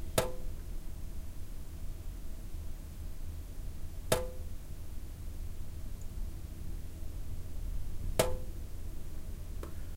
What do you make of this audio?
Faucet Dripping Slowly in Metal Sink
Metal, Sink, Dripping, Slowly, kitchen, Faucet